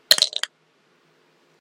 ice cube falling inside a cup
I just picked up an icecube I had in my empty water cup and dropped it pack in just to make this LOL
falling, dropping, sfx, icecube